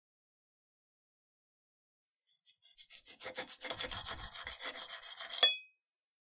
Slicing by knife. Recorded by TASCAM DR-40.
CZ, Czech, knife, Pansk, Panska, paring, slice